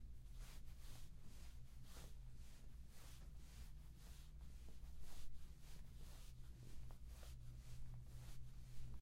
22-cloth sound
slide, cloth, swish, sound